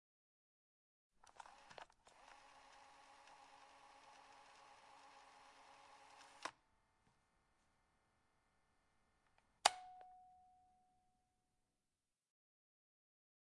Cassette Tape Rewind
Sound of the Rewind tape
Rewind, player, Tape, Cassette, Button, foley